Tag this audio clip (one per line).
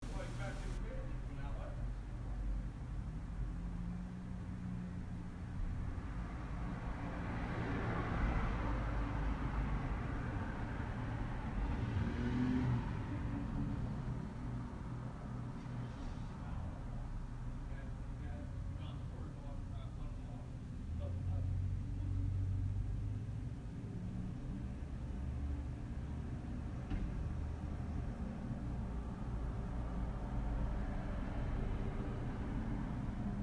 apartment
cars
field-recording
inside
listen
noise
street
talking